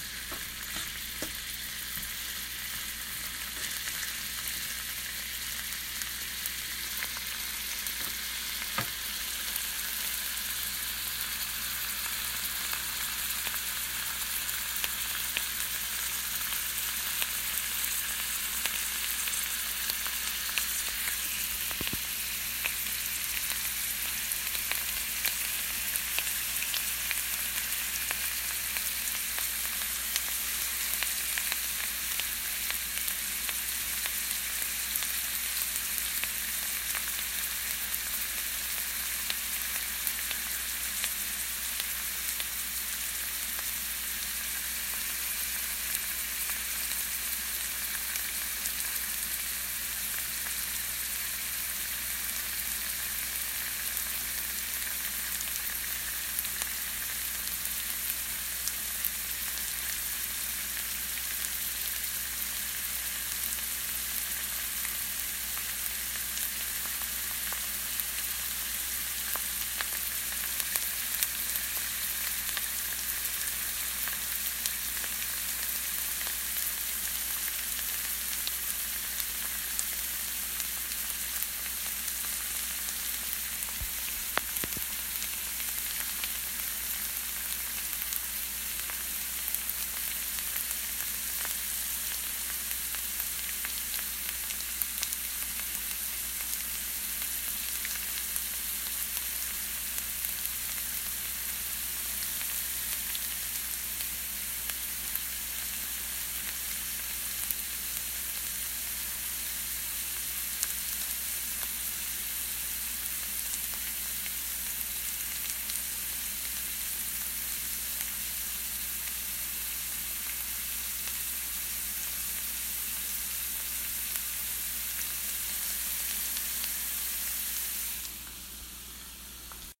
frying food on a stove top skillet